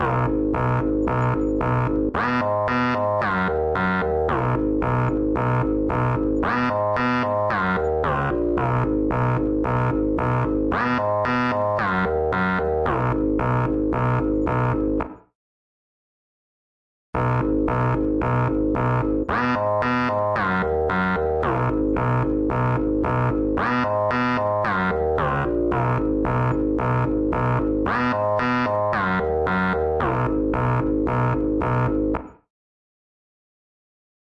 SAD SEQ LOOP
bpm, cumbia, dutch, melody, moombahton